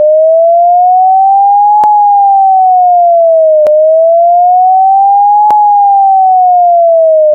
HARDOUINEAU Julien 2013 2014 son4
Made using Audacity only
Generate sound, frequency : 570
Apply a progressive variation of height : from 0 to 6 half-tones during the two first seconds, from 6 to 0 half-tones during the two final seconds.
Duplicate the sound obtained, and copy-cut it just after the original sound.
Typologie : Continu varié
Morphologie :
Masse : Groupe tonique
Timbre : Brillant
Grain : Lisse
Allure : Stable
Dynamique : Attaque abrupte puis évolution douce
Profil mélodique : Variation serpentine